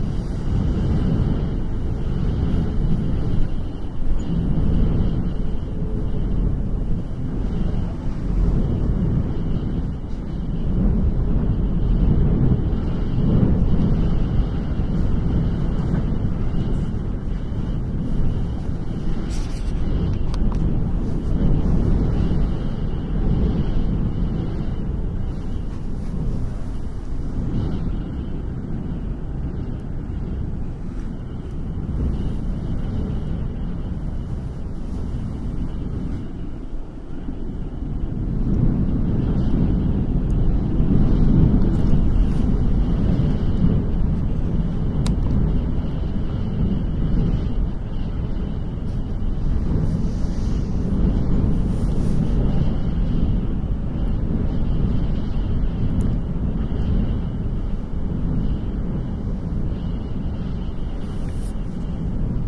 20130407 wind.rumble.03
noise of strong wind recorded from the inside